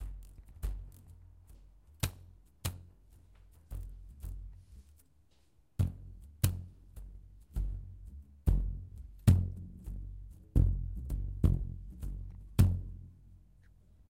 Sounds from objects that are beloved to the participant pupils at the Doctor Puigvert school, in Barcelona. The source of the sounds has to be guessed.
doctor-puigvert, mysounds, sonsdebarcelona, february, 2014